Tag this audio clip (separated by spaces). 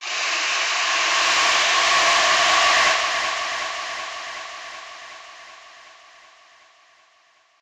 ZoomH2 Panner Slight Fader Effect Sweep